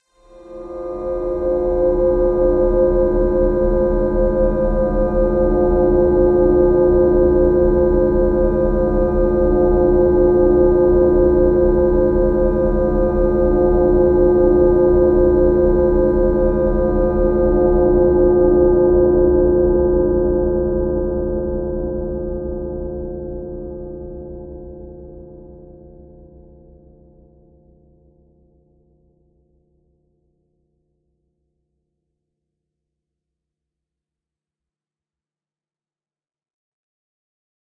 I made this by taking "Synth Lead 1", and then adding a lot of reverb to it in Audacity, thus having a lot of feedback. (I think it's feedback?)
digital, sinister, film, terrifying, drama, sci-fi, horrifying, terror, horror, suspense, thrill, scary, spooky, noise, dark, ambient, fx, soundesign, drone, electronic, haunted, creepy, fear, sound-design, weird, atmosphere, effect